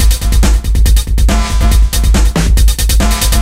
Thank you, enjoy
beats, drum-loop, drums